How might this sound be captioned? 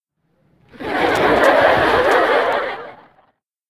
Laugh Track 5
Apparently I made this for my animation which supposedly a parody of sitcom shows...and since I find the laugh tracks in the internet a little too "cliche" (and I've used it a bunch of times in my videos already), I decided to make my own.
So, all I did was record my voice doing different kinds of laughing (mostly giggles or chuckles since I somehow can't force out a fake laughter by the time of recording) in my normal and falsetto voice for at least 1 minute. Then I edited it all out in Audacity. I also resampled older recordings of my fake laughters and pitched down the duplicated tracks so that it would sound "bigger".
Thanks :)